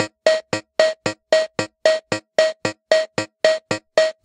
pss-130 rhythm march polka
A loop of the polka rhythm from a Yamaha PSS-130 toy keyboard. Recorded at default tempo with a CAD GXL1200 condenser mic.
rhythm, Portasound, Yamaha, keyboard, PSS130, toy